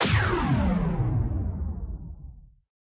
blaster shot 10 1(Sytrus,rsmpl,multiprcsng)single
Sounds of shots from sci-fi weapons. Synthesis on the Sytrus synthesizer (no samples). Subsequent multi-stage processing and combination of layers. Almost all of the serial shot sounds presented here have a single option (see the mark at the end of the file name), so that you can create your rate of fire, for example using an arpeggiator on one note. At the same time, do not forget to adjust the ADSR envelopes, this is very important in order to get the desired articulation of a series of shots. Single shots themselves do not sound as good as serial shots. Moreover, it may seem that the shots in the series and single, under the same number do not correspond to each other at all. You will understand that this is not the case when setting up your series of shots, the main thing, as I said, you need to correctly adjust the ADSR. May be useful for your work. If possible, I ask you to publish here links to your work where these sounds were used.
auto-gun, blaster-shot, explosion, firing, games, military, sci-fi, weapon